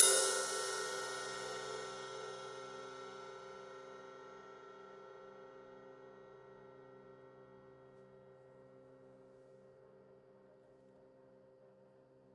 Drumkit using tight, hard plastic brushes.